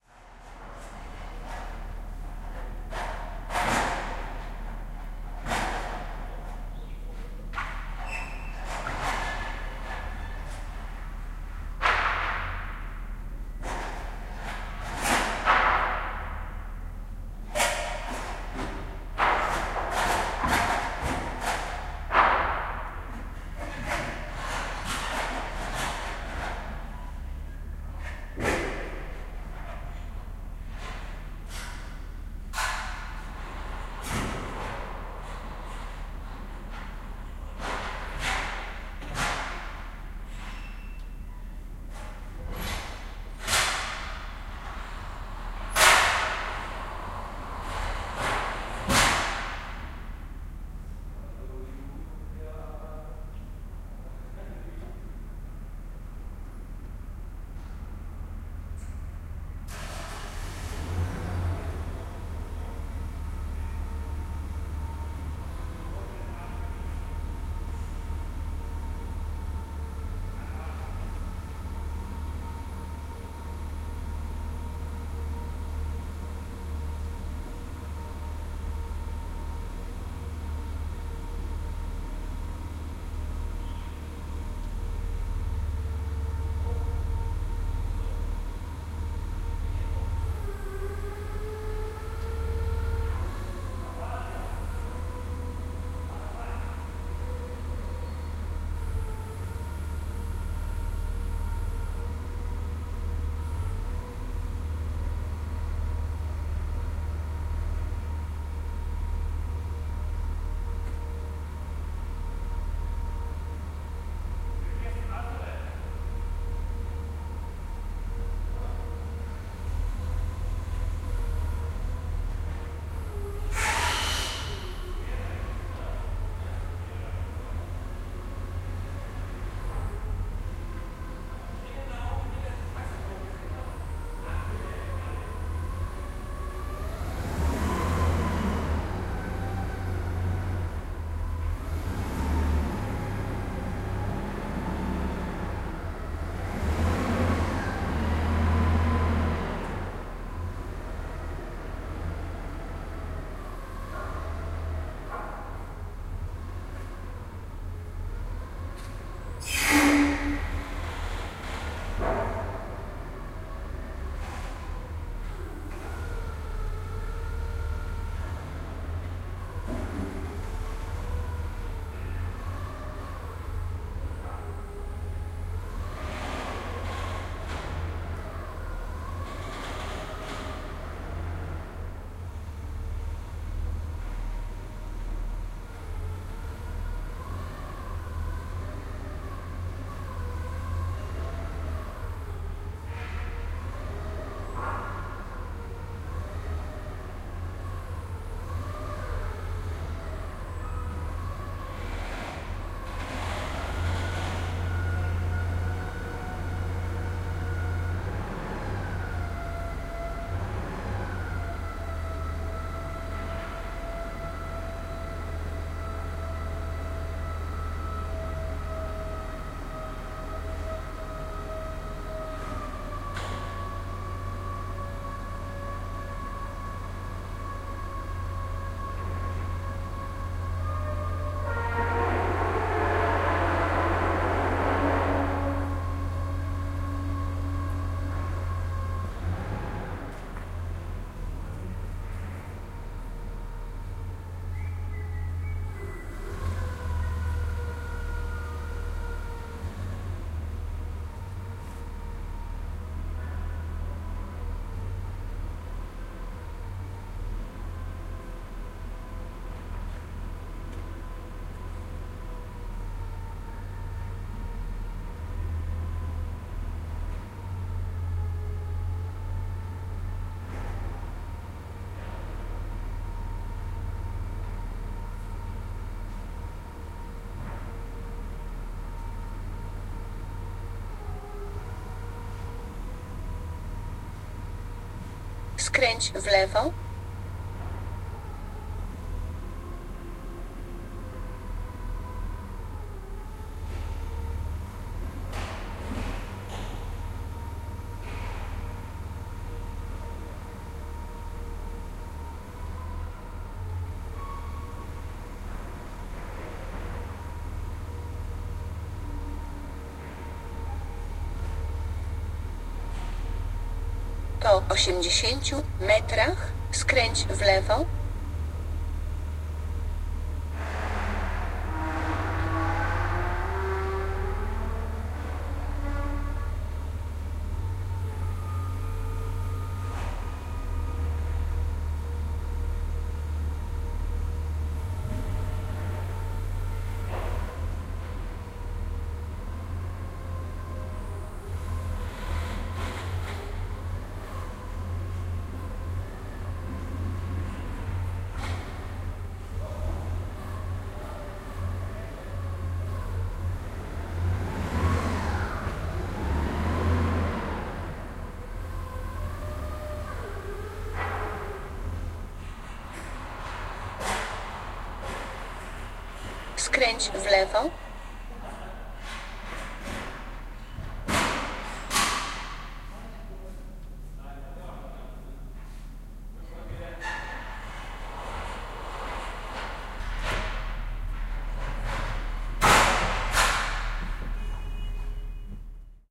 110815-loading pumpen in hamburg
15.08.2011: sixteenth day of ethnographic research about truck drivers culture. Germany, Hamburg. Loading huge pump closed in a wooden box. Sounds made by forklift.